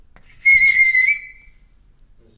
A chalk screeching on a blackboard - interior recording - Mono.
Recorded in 2012